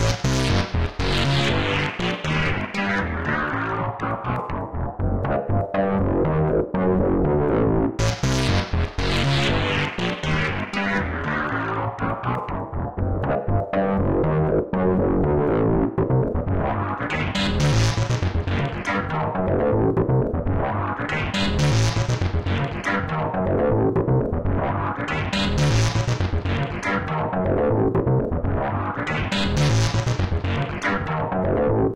Alien Killer From Nova-7 (Bassline Loops), made with Novakill's PISSCUTTER VSTi plugin. For these loops I played a sequence of notes and used my tweaked settings for phase distortion oscillator (PMOD), unison detuner, sound envelope and filter, pattern sweeper, VCA, random overdrive and post-mastering.
00.000 : Suspense Loop (120 bpm)
15.978 : Action Loop (240 bpm)
This Gothic/EBM-style bassline may sound similar to some of Novakill's tracks, but it is my own self-made creation ofcourse and I take pride in it that I played with the VST settings and tweaked the output for hours until I got that distinct dark acid sound which is typical for EBM tracks. My focus however is to create a more alien and futuristic sound.
I'm going to make and upload more samples of Novakill VST's in my new pack.
Check my forum topic for testing Novakill VST's.
/*\ DeClassified Information \*/